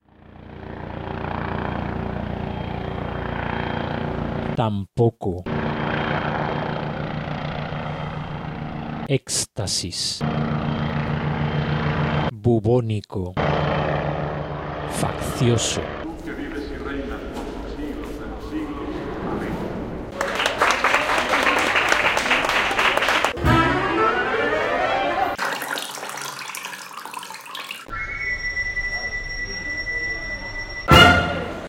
I made this sample using several other sounds, but it is not really a mix, in the sense that sounds replace each other (do not play at the same time). I guess the technique could be named collage or something similar, an attempt at making rhythm from disparate noises. First you hear a copter, which is interrupted a few times by my voice saying words, then enters the sound of a ceremony in a catholic church, clapping hands, a short excerpt from musical instruments, dripping of water, a whistle, and...
experimental, rhythm, collage, voice